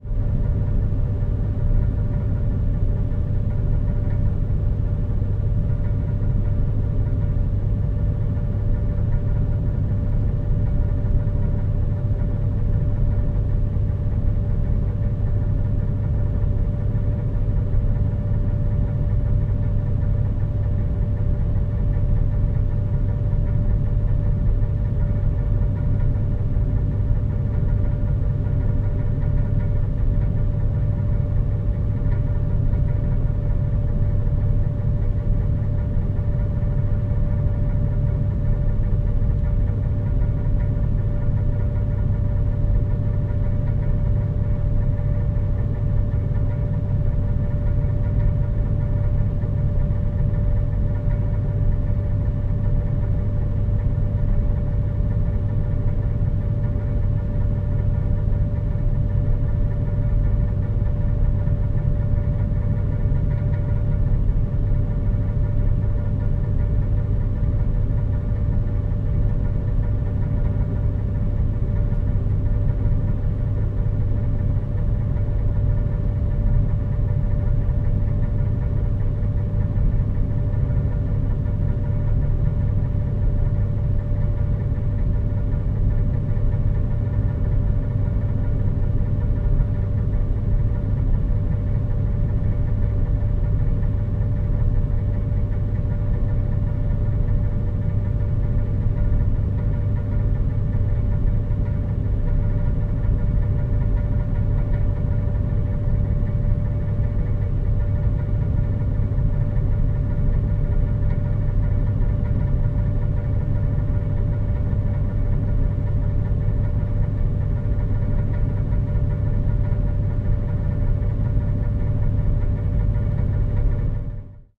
Rumble on the air conditioning conduction. Recorded with a Sennheiser MKH40. Mono track. Lowpass filtered. No speed change. No pitch shift change.